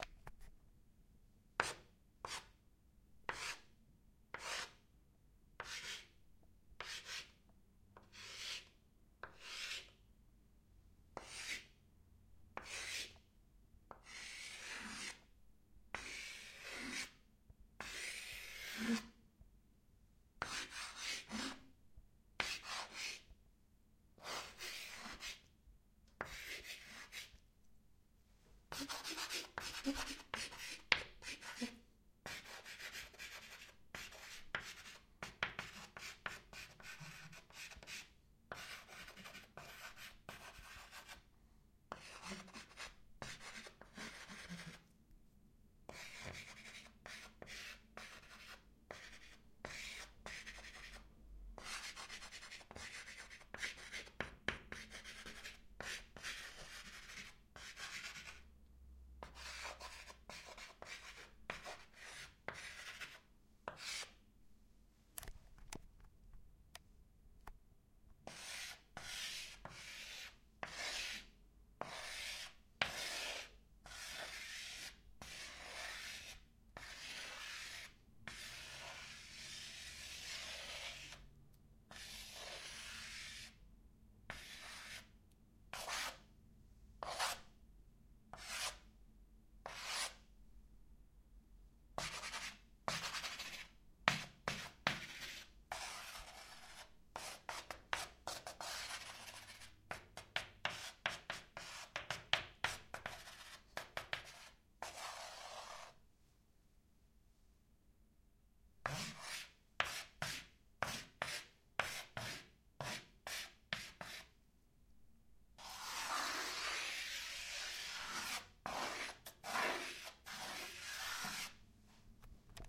Writing with Chalk / Chalkboard
Writing with chalk.
blackboard, board, chalk, chalkboard, writing